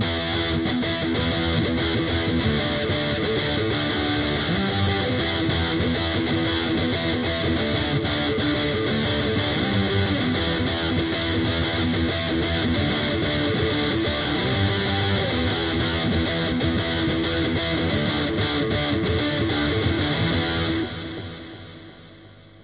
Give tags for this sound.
guitar
rythm
distortion

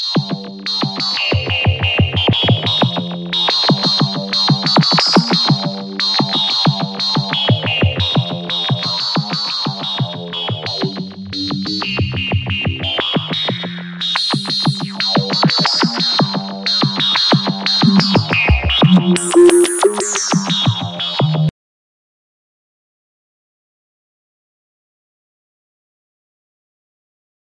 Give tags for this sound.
ambient
backdrop
background
bass
bleep
blip
dirty
electro
glitch
idm
melody
nord
resonant
rythm
soundscape
tonal

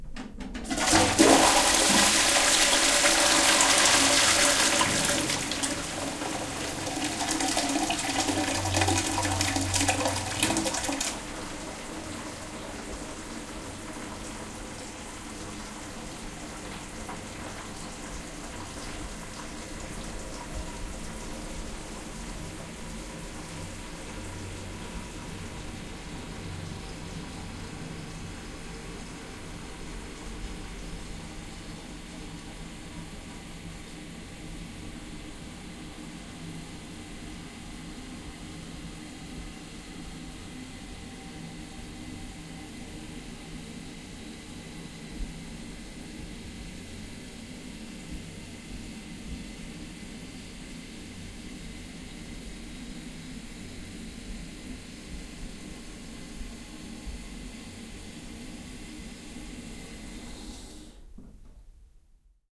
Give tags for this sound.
bathroom
flush
toilet
water

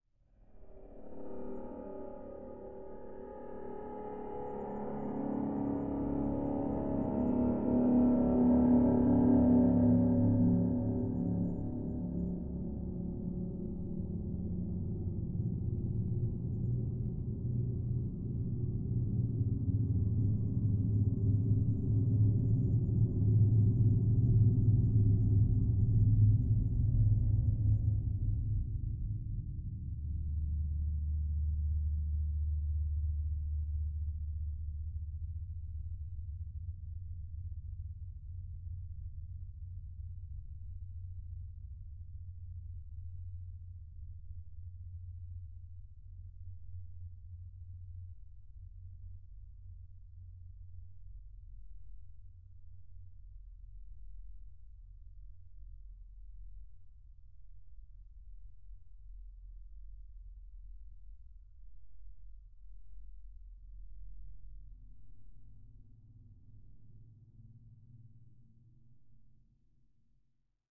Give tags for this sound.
spacy
processed
space
bowed-string

